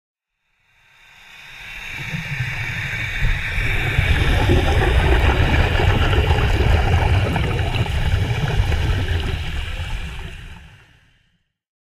Ah-5 Near Exhaust 1
Recording of the exhaust on an Ah-5 freeflow helmet at about 16m. Ah-5 is the mdern version of a Siebe Gorman or a Mark V. Audio taken from a GoPro H4 Black.